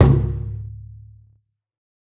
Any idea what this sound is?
EQ'ed and processed C1000 recording of a good old metal bin. I made various recordings around our workshop with the idea of creating my own industrial drum kit for a production of Frankenstein.
drum, bin